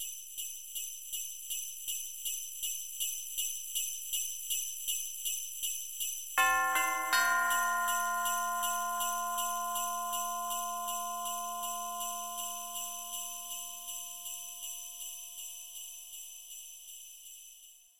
Christmas Bells
160bpm, Bells, Christmas